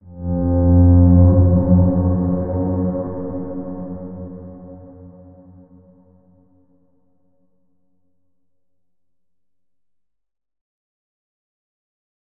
This sound is a battle horn made by heavily processing a sample I've uploaded previously. But it's descent is completely unrecognisable. This somewhat sinister sound might be heard right before the beginning of a medieval/fantasy battle. Or it could be the callsign for the "bad guys" (werwolves of course) to retreat :)
I would like to know and hear/see the results of what you've done with my sounds. So send me a link within a message or put it in a comment, if you like. Thank You!
battle, creepy, danger, dark, eerie, fantasy, game, horn, medieval, movie, scary, sinister, strange, threat, threatening, video